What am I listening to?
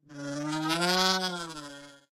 kazoo swoop (reverse)
the sound of a kazoo coming by in reverse
kazoo, reverse, swoop